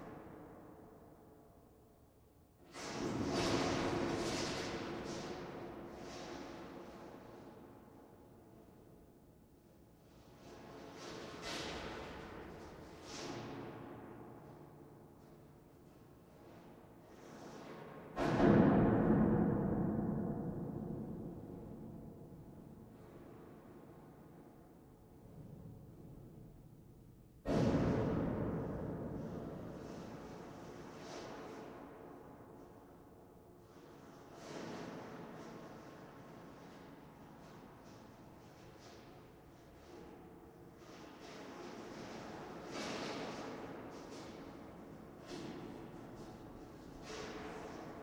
Metal Rustle Loop
Some metal rustling I recorded with some reverb.
thrill, field-recording, metallic, reverb, creepy, background, ambience, metal, ambient, weird, atmosphere, looping, loop, spooky